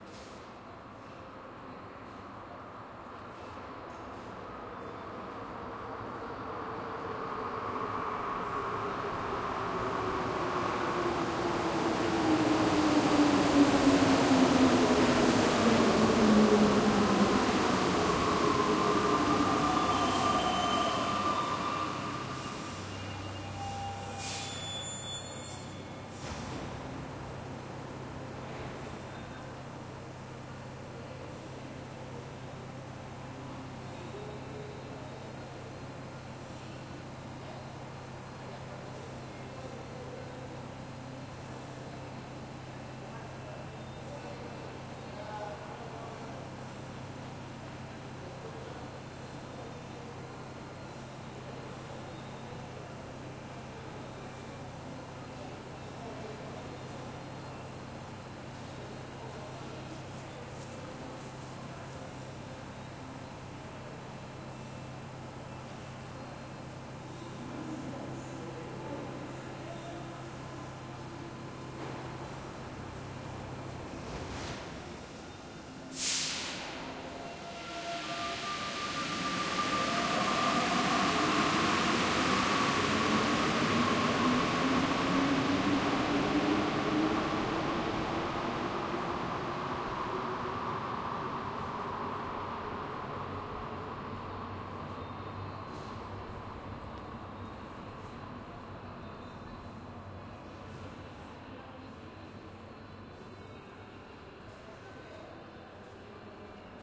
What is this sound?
Subway in Santiago, Chile april 23 2015
Subway arriving at its station in Santiago of Chile.
Chile, metro, n, Santiago, subway